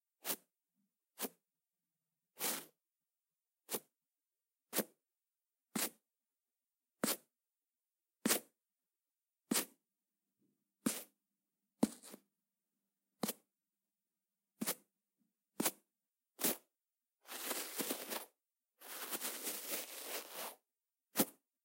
footsteps-rubbing-scratching
simple sounds that you can edit and use in your project! For example in the game :)
Instrument - Toothbrush
recorded by phone xiaomi A2 litle
Edited in Audacity (noise reduction)
change speed, altitude, or add filters and get an interesting effect!
scratching, rubbing, game, footsteps, effect, grass